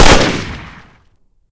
Layered Gunshot 6
awesome, cool, epic, shoot, shot